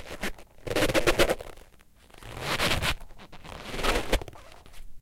zoom H4.
pulling the yoga mat with my hand and letting it slip.